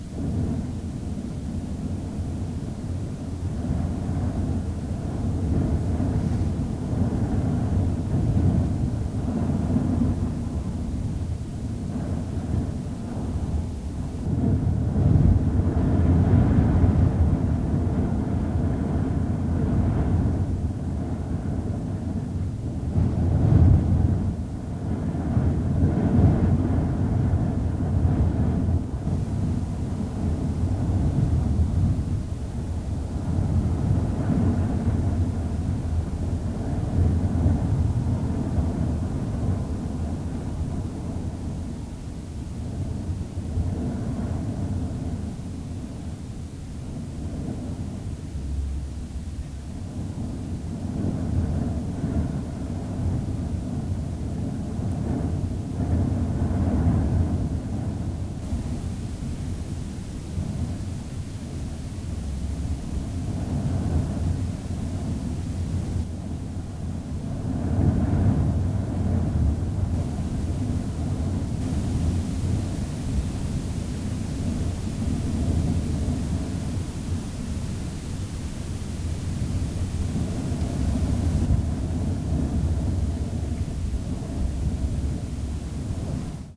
The Sound of Wind heard from inside a building
Recorded in my loft on a Zoom H4n - plus a shotgun microphone.
Wind, Heard-from-inside, Outside-building